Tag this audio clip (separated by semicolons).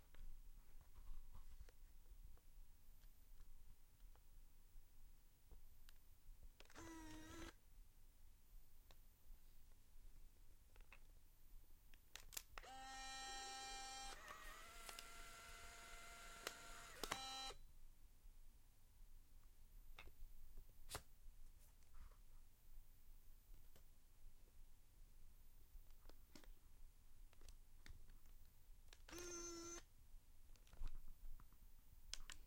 camera; polaroid; shutter